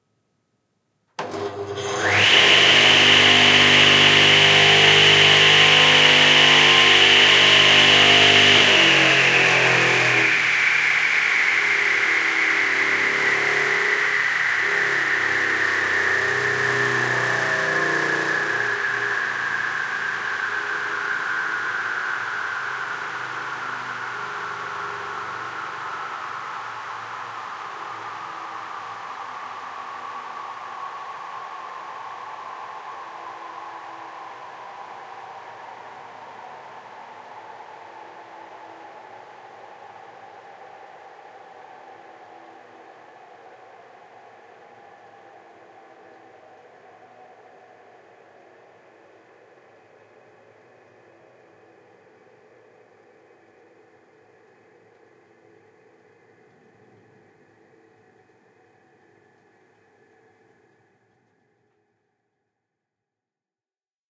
Circular saw
A stereo field recording of a 2 hp circular bench site (portable)saw started and switched off. Rode NT4>Fel battery preamp>Zoom H2 line in
field-recording, saw, bench-saw, mechanical, electric, machine, machinery, environmental-sounds-research, circular-saw